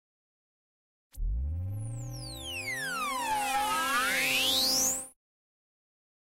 Time-Machine Up:Long
Weird Sound Thing
Edited, Free, Mastered